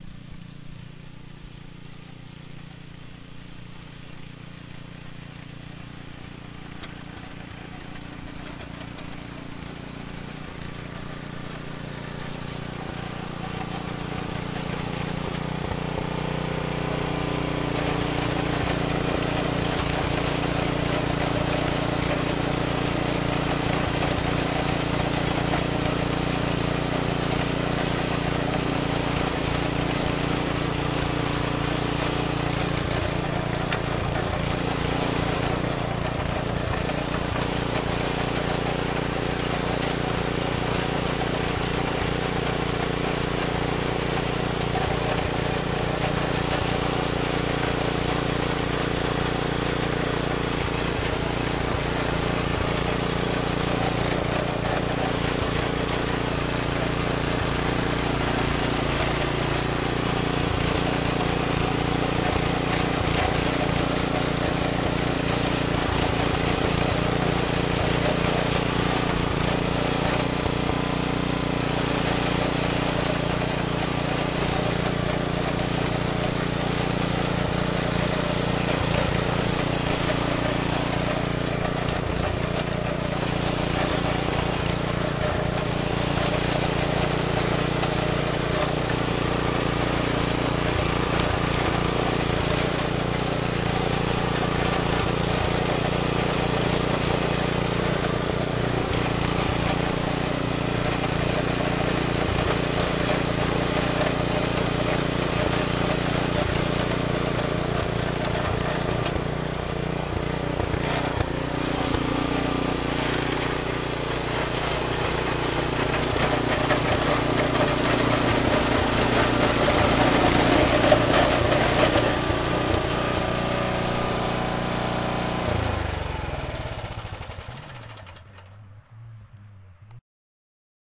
Motorized Tiller
My dad using a gas-powered tiller on the side of his driveway. Unfortunately, I didn't realize his truck radio was on, though you can only hear it when he turns the tiller off at the end of the recording. It was recorded on the Zoom H4N.
grind, machine, tiller, motor, gas-powered